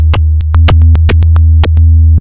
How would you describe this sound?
110 bpm FM Rhythm -46
A rhythmic loop created with an ensemble from the Reaktor
User Library. This loop has a nice electro feel and the typical higher
frequency bell like content of frequency modulation. An underground
loop with some high and low frequencies. The tempo is 110 bpm and it lasts 1 measure 4/4. Mastered within Cubase SX and Wavelab using several plugins.